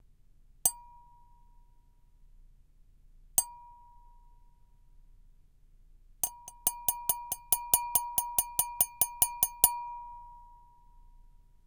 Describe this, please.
200809-WINE GLASS STRIKES 3
-Wine glass strikes